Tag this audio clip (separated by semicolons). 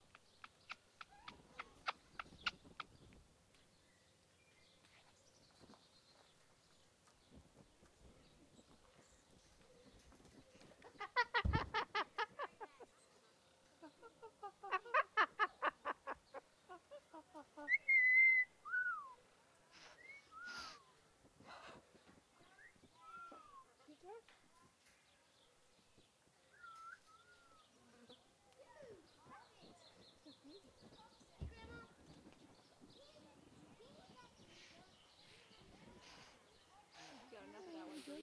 Bird; Horror; McCaw; Parrot